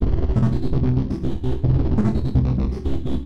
grossbass filtered pitch shift1
grossbass pitch shift1
bass, loop, synth-bass